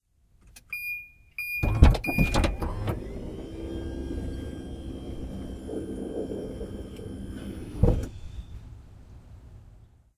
opening tailgate
sound of autmatic minivan tailgate opening